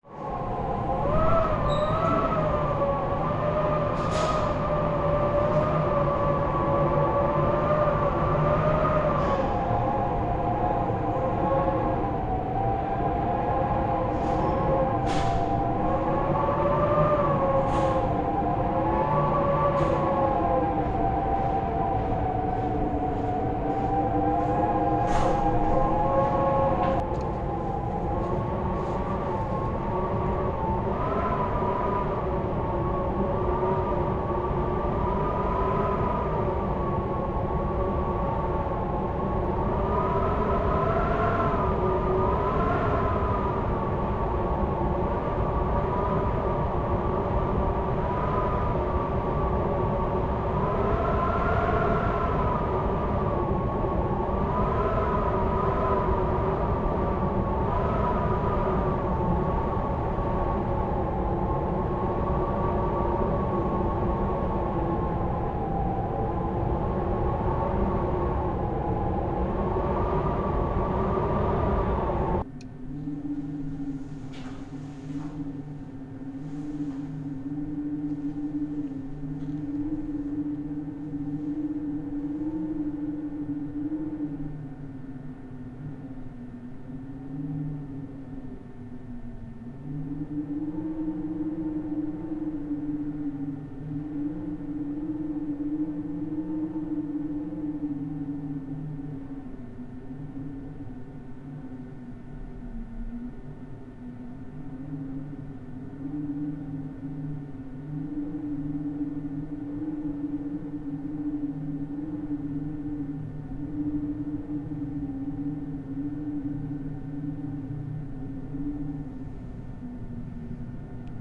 When the wind hits the building it howls through the elevator shaft like a hurricane. I recorded this with my android phone standing outside the elevator shaft. Hope you enjoy it and find it useful for something epic or scary.
The second half of the sample is from a nearby stairwell, different sort of (More subtle?) howling wind sound.